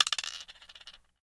Dropping one mancala piece onto the board.